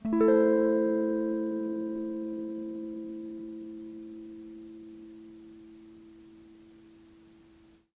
Tape El Guitar 19
collab-2, el, guitar, Jordan-Mills, lo-fi, lofi, mojomills, tape, vintage